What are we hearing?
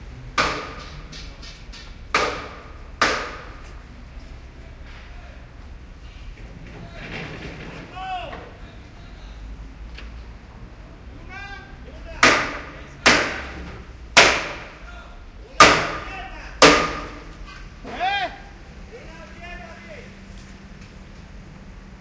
Binaural recording done (as I walked) of bangs, shouts of two
workingmen at a building construction. Done with a pair of in-ear
Soundman OKM microphones / martillazos y gritos de dos trabajadores en una obra. Grabacion binaural hecha (mientras andaba) con un par de microfonos Soundman OKM puestos en las orejas